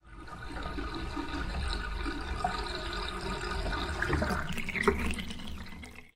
Bathtub Unfilling
Brainstorming, Bath